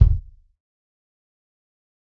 Dirty Tony's Kick Drum Mx 036
This is the Dirty Tony's Kick Drum. He recorded it at Johnny's studio, the only studio with a hole in the wall!
It has been recorded with four mics, and this is the mix of all!
dirty, drum, kick, kit, pack, punk, raw, realistic, tony, tonys